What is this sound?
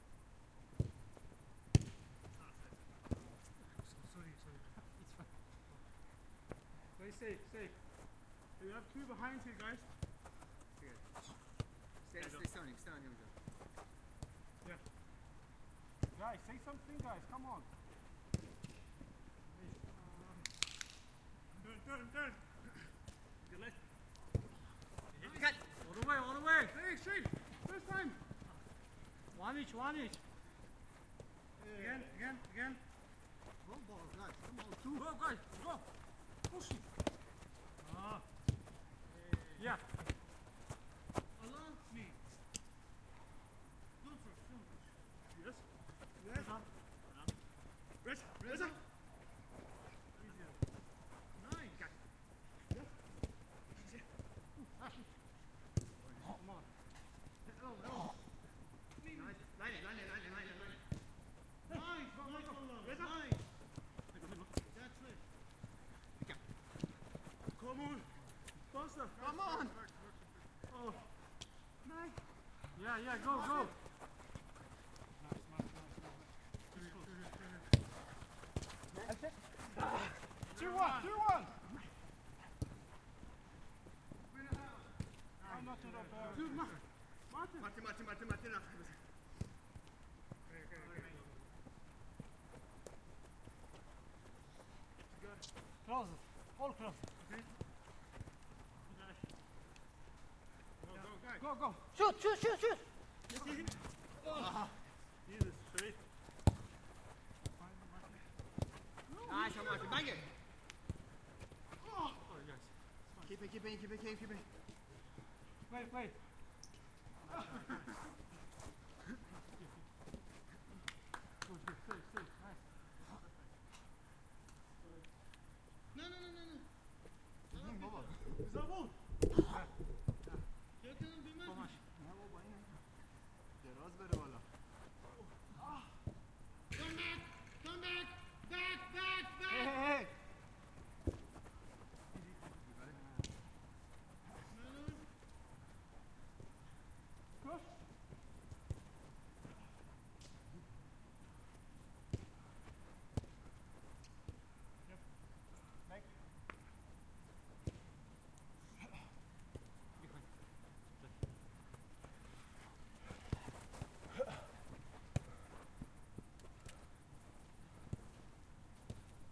Locals plaing footbal on small pitch after rain. Evening suburb ambiance. Clear voices.